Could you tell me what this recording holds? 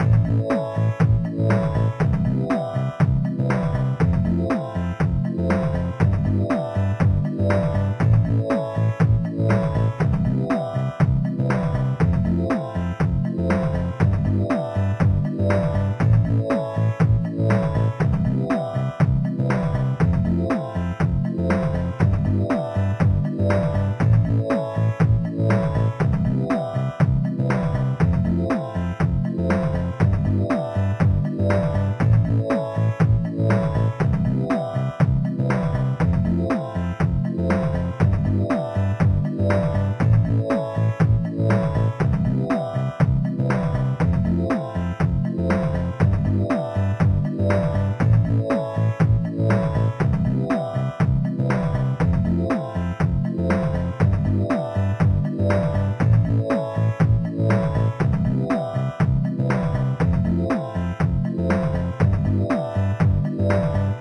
8 bit game loop 005 simple mix 1 long 120 bpm
free, mario, synth, bpm, loop, gamemusic, gameloop, nintendo, electronic, 8-bit, sega, beat, 8, drum, 8-bits, game, bass, electro, music, 8bit, loops, 120, gameboy, bit